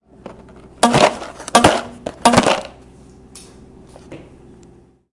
mySound SPS Milan
Sounds from objects that are beloved to the participant pupils at the Santa Anna school, Barcelona. The source of the sounds has to be guessed.
CityRings, Milan, mySound, Belgium, Ghent